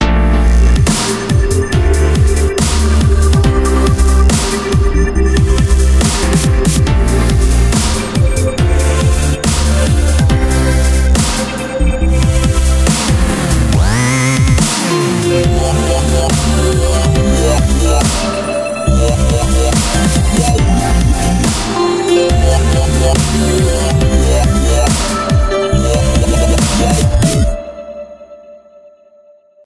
For You Template 01
This is a clip especially made as a loop for anyone to use as they wish. This track is currently under going work :)
Enjoy
X=x